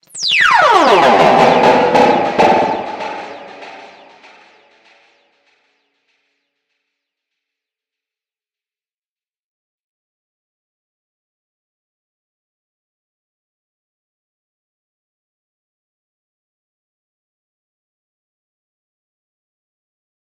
Inspired by Malh007's 808 fx...though created in an entirely different manner
LMMS + TripleOscillator + Calf Reverb